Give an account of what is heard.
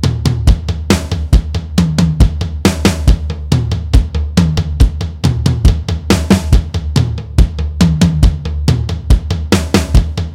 Rock drum loop
My first drum loop created on my Yamaha electronic drums. Recorded into a laptop and then edited in audacity